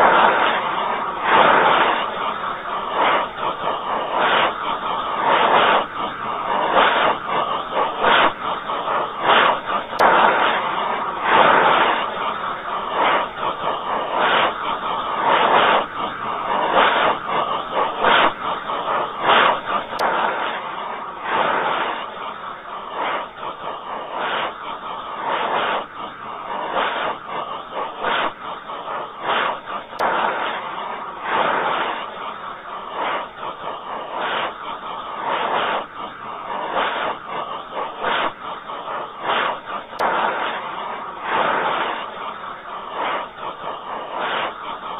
tv static slowed down and looped kinda
tv static looped and slowed down kinda
performance, art, MTC500-M002-s14